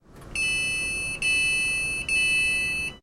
Raw audio of the beeping that occurs on British Southwest commuter trains to let passengers know they can open the doors. This recording is of the exterior beep from the outside of the train.
An example of how you might credit is by putting this in the description/credits:
The sound was recorded using a "H1 Zoom V2 recorder" on 26th May 2016.